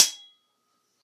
Sword Clash (48)
This sound was recorded with an iPod touch (5th gen)
The sound you hear is actually just a couple of large kitchen spatulas clashing together
steel, metallic, clashing, slashing, stainless, clash, struck, iPod, metal-on-metal, ring, ting, swords, sword, metal, slash, impact, ping, hit, knife, clank, strike, clanging, ding, ringing, clang